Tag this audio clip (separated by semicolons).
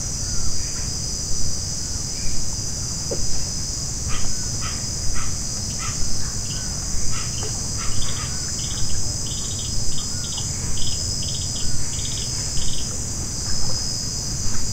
Amazon; ambiance; ambience; ambient; bird; birds; field-recording; forest; insect; insects; Jungle; lagoon; Madre-De-Dios; motorboat; nature; Rain-Forest; River; summer; Tambopata